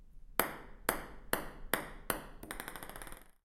Dropping ping pong ball on table
Dropping a ping pong ball on a ping pong table.
Ping, table